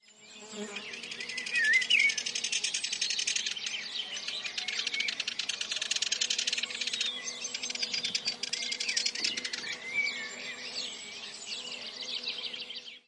The harsh call from a Warbler. Primo EM172 capsules inside widscreens, FEL Microphone Amplifier BMA2, PCM-M10 recorder
nature, forest, Warbler, birdsong, spring, birds, field-recording